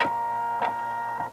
The sound of a servo-motor.